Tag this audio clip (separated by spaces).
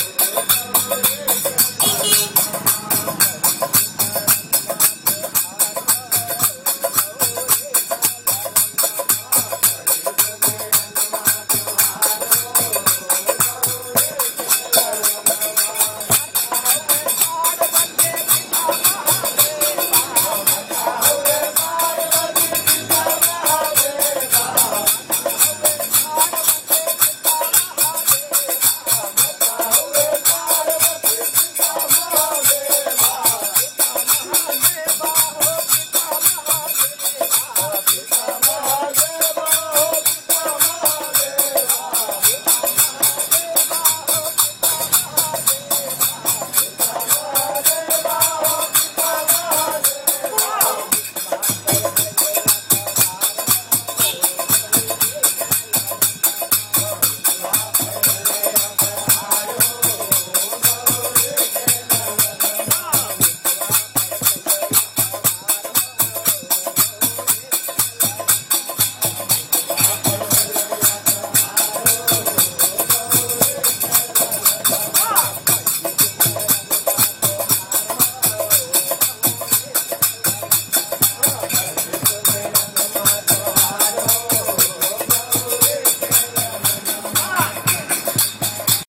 kirtan bhajan hindi Kirtana